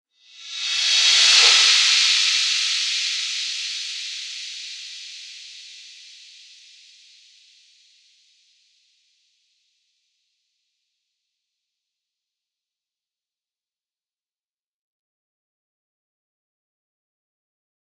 Rev Cymb 27 reverb
Reverse cymbals
Digital Zero